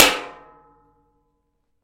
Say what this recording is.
a metal tray is struck with a metal ruler. recorded with a condenser mic. cropped and normalized in ReZound. grouped into resonant (RES), less resonant (lesRES), and least resonant (leaRES).